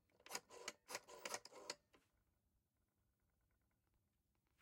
old rotary phone